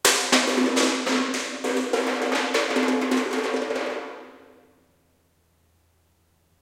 Kicking empty paint can 4
Exactly as described. Kicking an empty paint can.
mic, can, paint, stereo, kick, warehouse